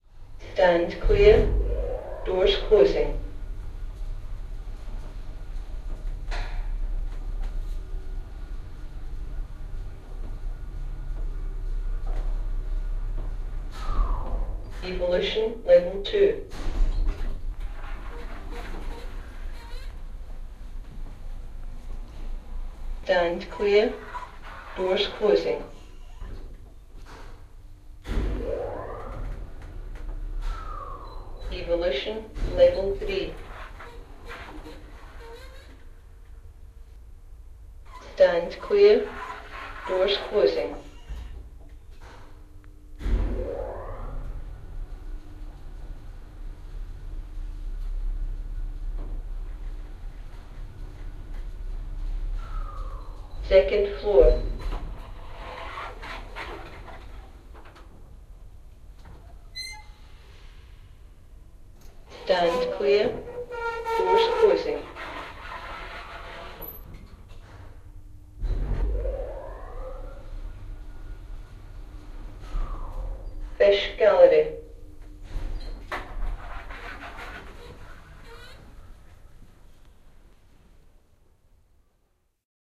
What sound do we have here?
The sound of a Lift/elevator recorded in the National Museum of Scotland, Edinburgh.
Recorded on a Sharp Mini disc recorder and an Audio Technica ART25 Stereo Mic
elevator, lift, museum, scotland